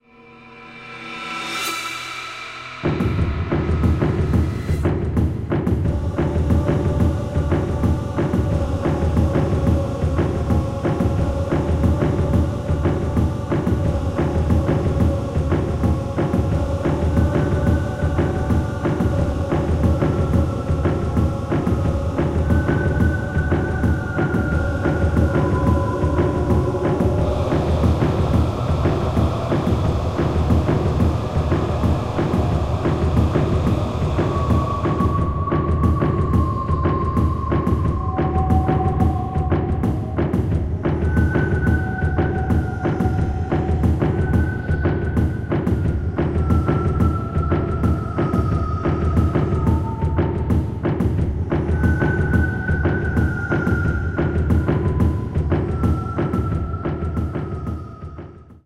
A new strange dance recorded in one of my trips to Pluto ;)
Created with various sound synthesizers and recorded with Reaper and processed with Sony Sound Forge Audio 10.